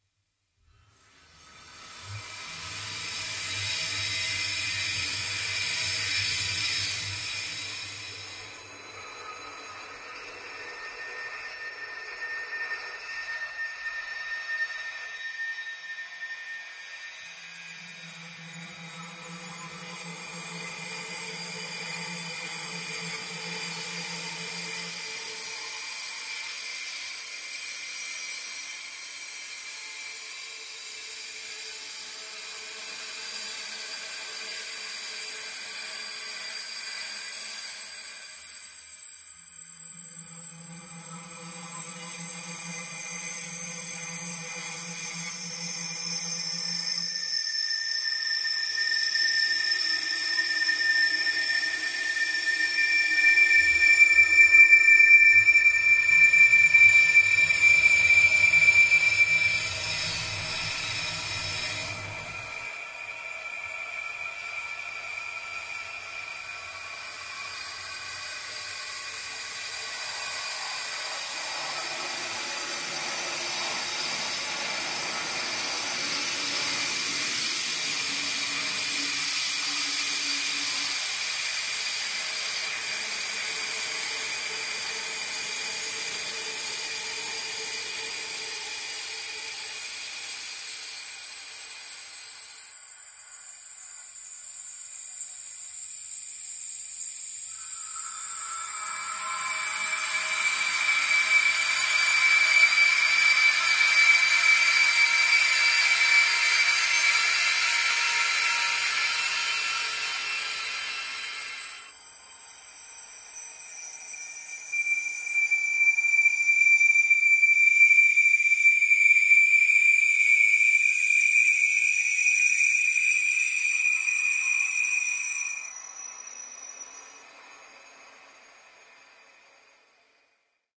using granular synthesis, convolution, distortions, and a simple white noise to start out with, i constructed this miasmatic theme for use as soundtrack for a short experimental film series i'm working on consisting of time lapse cloud movements